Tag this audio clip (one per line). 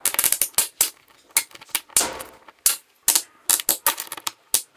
power
voltage
electricity
circuitbending
arc